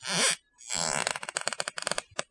A couple of squeaks from the floor when I shift my weight back and forth.Recorded with a Rode NTG-2 mic via Canon DV camera, edited in Cool Edit Pro.